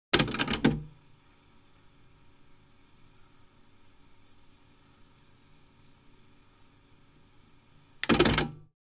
Sound of a cassette deck, playing an audio cassette.
Recorded with the Fostex FR2-LE and the Rode NTG-3.